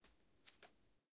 Wood Floor Soft Barefoot Sticky Footstep 1 5
Loud, Feet, Footstep, Real, Soft, Moving, Boot, Foley, Sneakers, Hard, Wooden, Step, Shoe, Barefoot, Floor, Stepping, Movement, Ground, Running, Wood